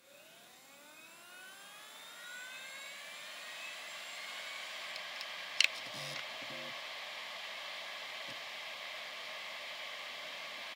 A close recording of two harddrives spinning up
harddisk, spinup